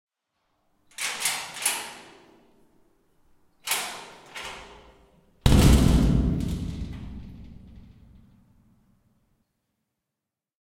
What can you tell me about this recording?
big metal unlock and slam
recording of unlocking and opening a heavy metal door in a garage and then slamming it to close. there is a bit of echo.recorded using a zoom h4n